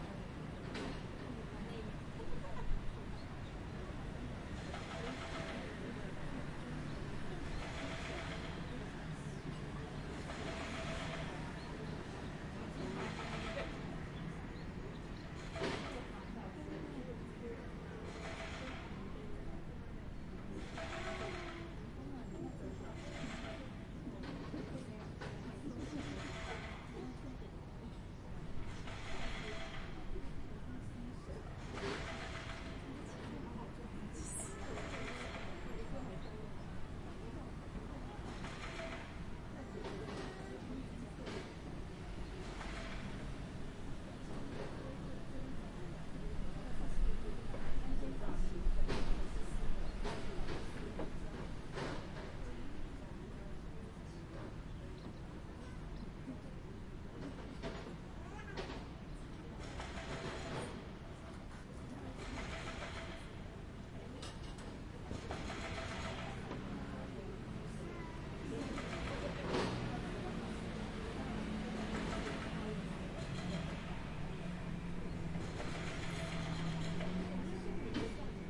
street in beitou, taiwan